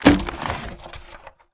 Barrel Break 3
Breaking a single wooden barrel.
barrel, break, wood